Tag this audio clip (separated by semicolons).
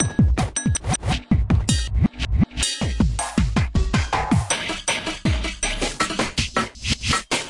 acid; breakbeat; drumloops; drums; electro; electronica; experimental; extreme; glitch; hardcore; idm; processed; rythms; sliced